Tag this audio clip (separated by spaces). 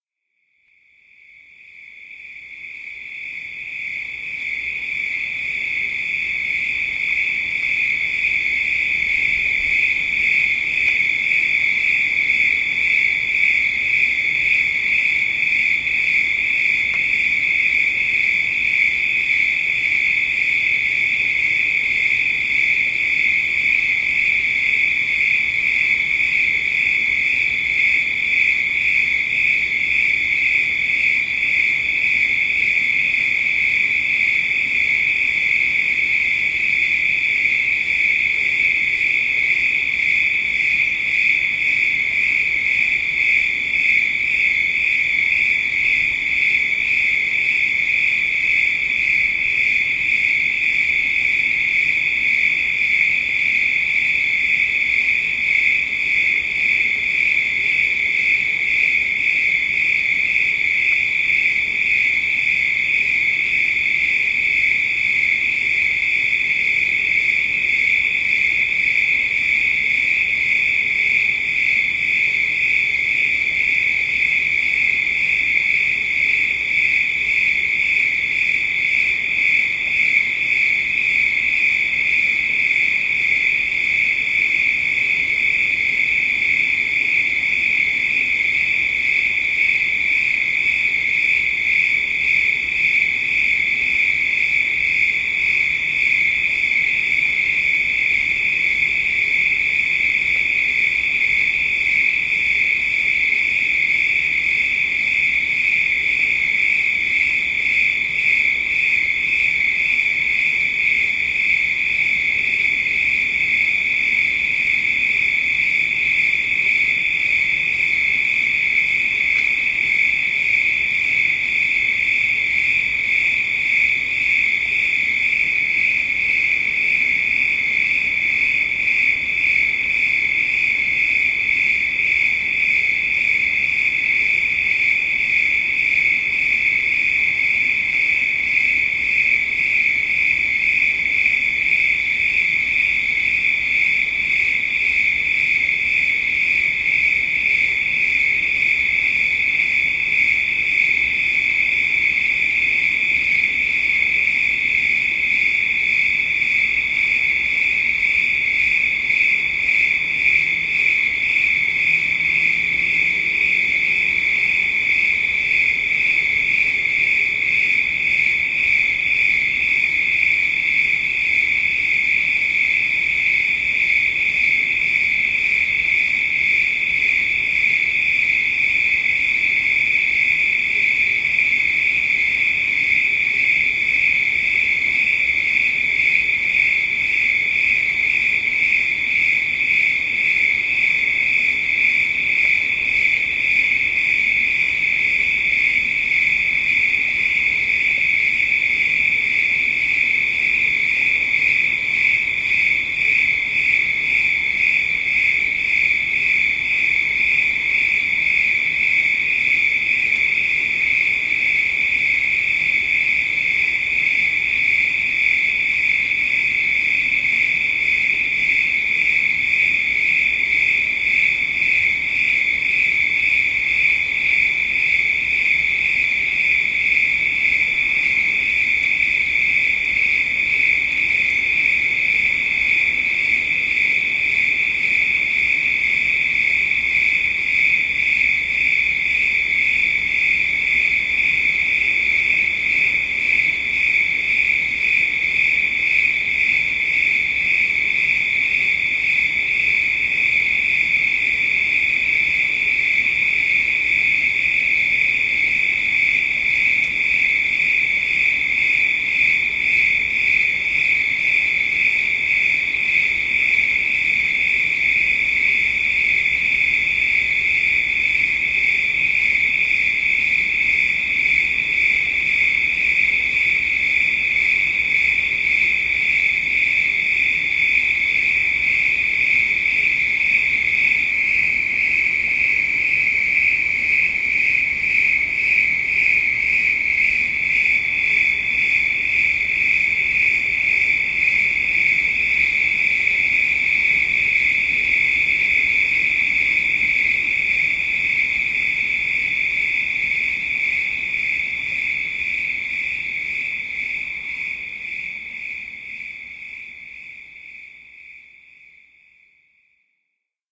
sherman-island california crickets ambient